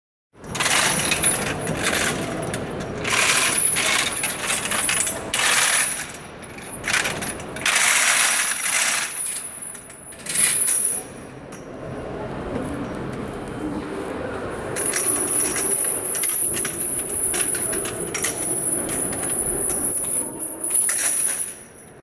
Chains being pulled recorded indoors.
chains, metal, money, rattle, rattling, shake